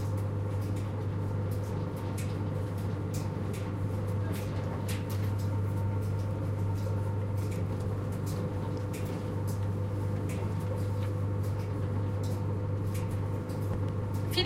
sonicsnaps LBFR Bhaar,Estella
Here are the recordings after a hunting sounds made in all the school. It's a dryer
Binquenais, sonicsnaps